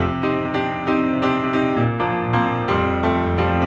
Flügel Bigbeat 03 - 132
Steinweg Grandpiano recorded at MusikZentrum Hannover / Germany via Sennheiser MD421 MK1 (bottom) and Sennheiser 2 x MKH40 (stereophonic)
written and played by Philip Robinson Crusius
loop - 132 bpm
klavier, loop, funk, funky, bigbeat, grand, 132, grandpiano, beat, steinweg, steinway, piano, big